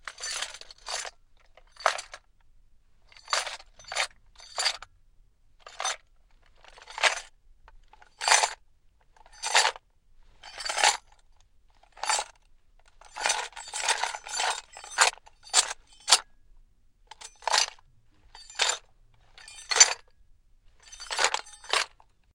Shuffling gear or armor.
Armor, gear, shuffling